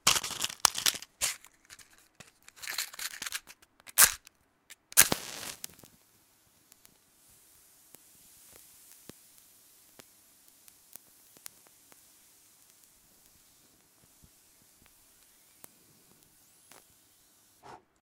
Matchbox Lighting Match Stick
Opening of a small matchbox, grabbing a match and lighting it. I had to strike the surface twice, the match gets lit with the second strike. It gets blown out at the end. After striking the phosphorus surface, the noise you hear is actually caused by the flame and burning wood and escaping gases on the match!
Recorded with Sennheiser ME 64 on Focusrite Scarlett.
If you use my sound I would love to see, how. If you like, share your project.